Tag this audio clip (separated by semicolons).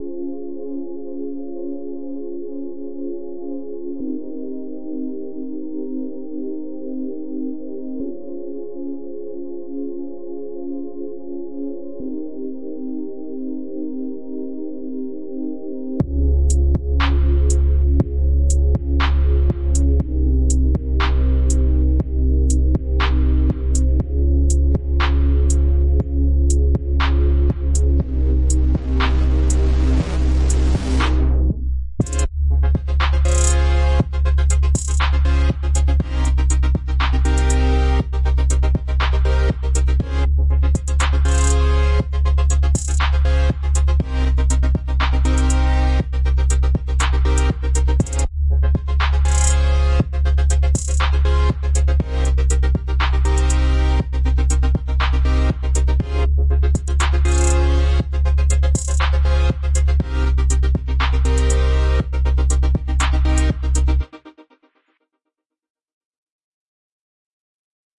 Chill; EDM; Dance; Music